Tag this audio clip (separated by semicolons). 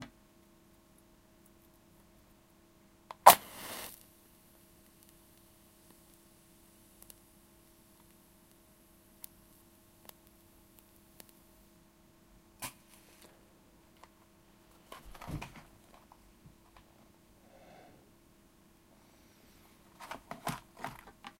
matches; match